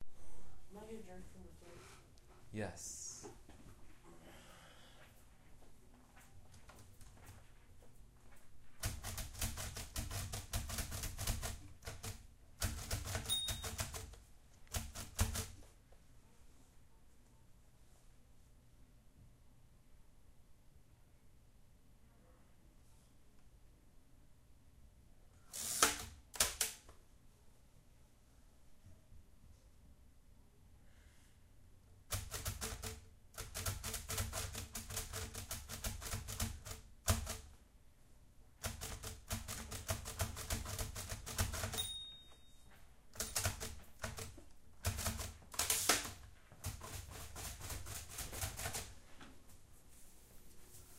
Perkins Brailler Noises
This is a field recording of a blind student typing on a Perkins Brailler.
braillewriter, perkins-brailler, typing